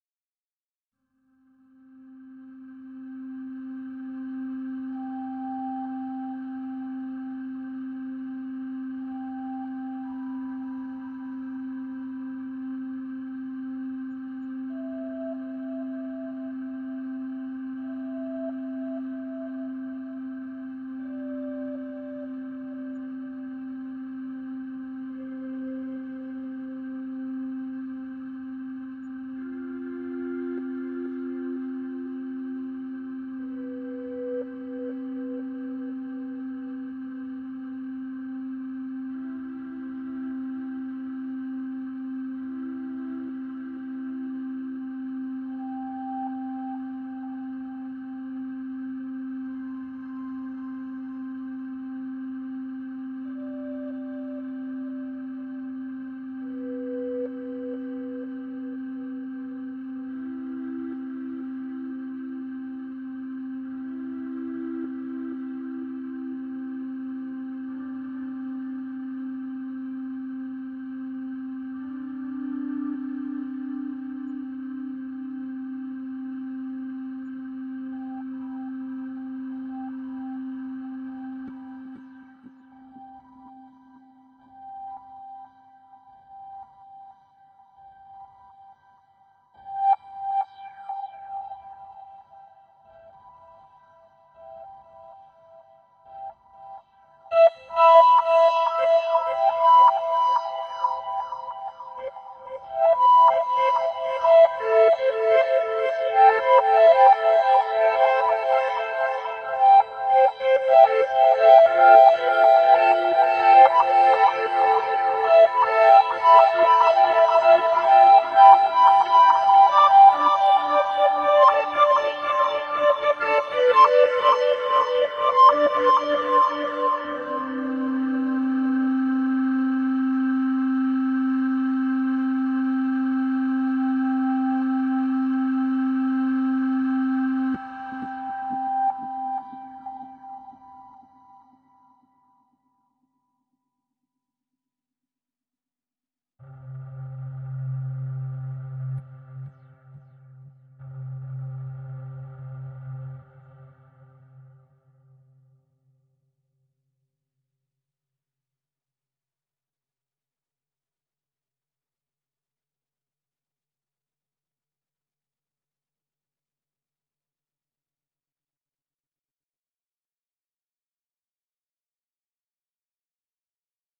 Draft I - Safety Blanket
A short film soundtrack draft, composed on logic pro.